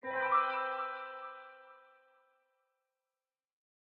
ui sound 5
large piano arpeggiation
application, chord, computer, harmonic, interface, menu, option, piano, ui, warning